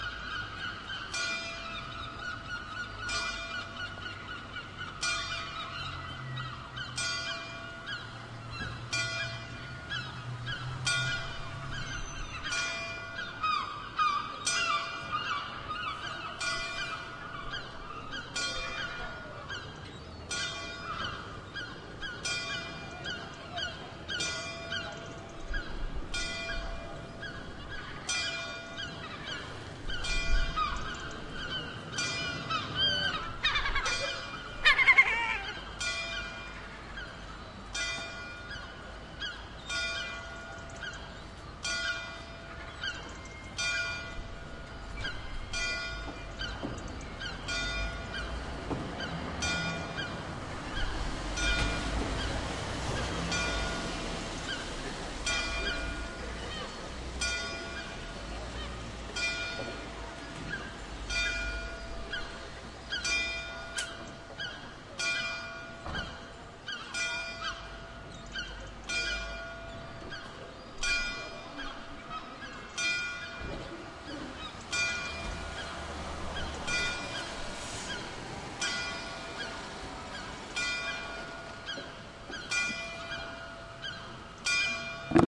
Seaside Town
A recording made out of my hotel window in Ilfracombe, Devon. 2011 [Zoom H2]
town, seaside, seagull, village, ambience, clock, bell, coast